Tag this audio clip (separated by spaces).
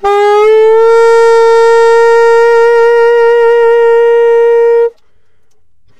sax saxophone vst tenor-sax sampled-instruments woodwind jazz